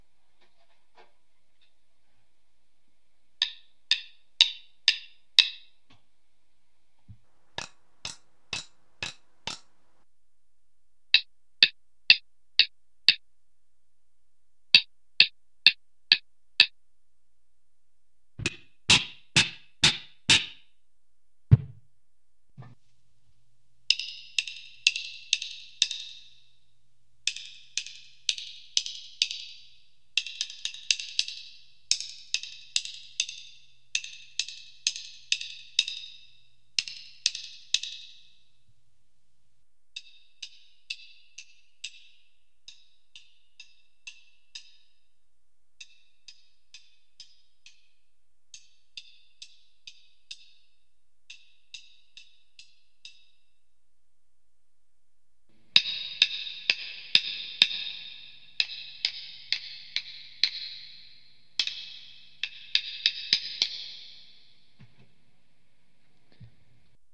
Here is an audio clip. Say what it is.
processed sticks
Various processed hits from Zildjian drum sticks that came with Rock Band. Recorded through a Digitech RP 100. Various effect settings.